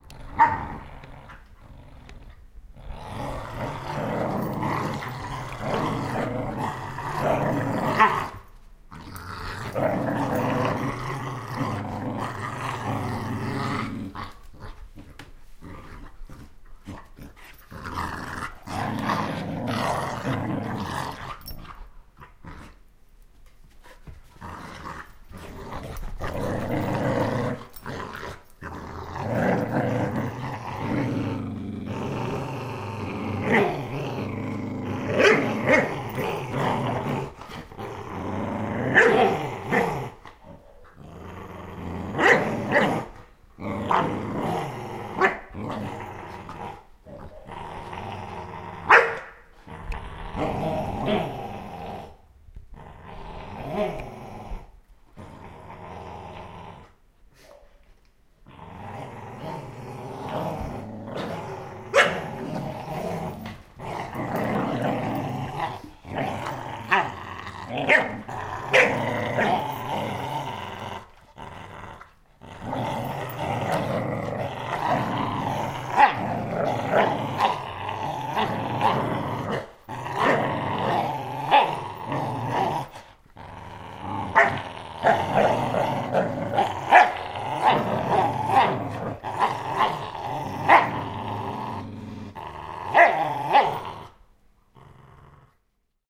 Dogs Playing Tug-Of-War
Two dogs play-fighting over a chew toy indoors.
Just curious!